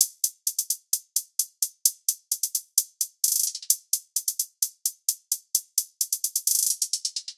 tm0g Trap Hats
I made this pattern in ableton. Enjoy and use it in whatever.
130, hop